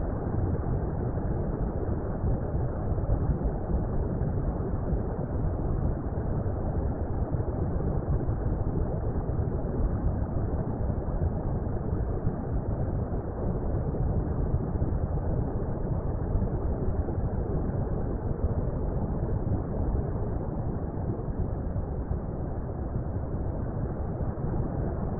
underwater loop1
A watery rumble, made using roughly the same process as ambient_loop2 but with more editing.
water, loop, rumble, underwater, ambient